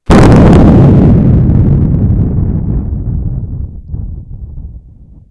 Quite realistic thunder sounds. I've recorded them by blowing into the microphone
Weather, Loud, Lightning, Thunder, Storm, Thunderstorm